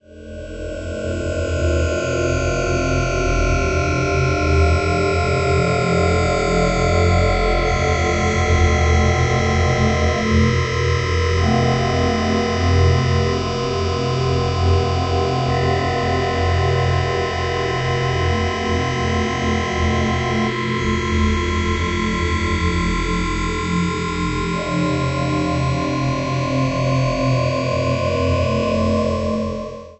Dark ambient drone created from abstract wallpaper using SonicPhoto Gold.
ambient, atmosphere, drone, img2snd, dark, sonification